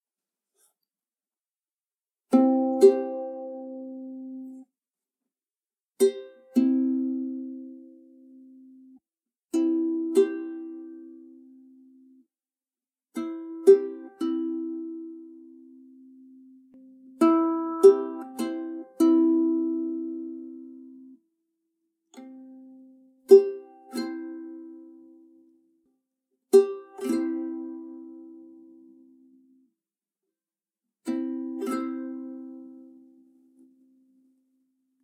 simple sounds that you can edit and use in your project! For example in the game :)
Instrument - ukulele
recorded ... by phone :) (xiaomi A2 litle)
Edited in Audacity.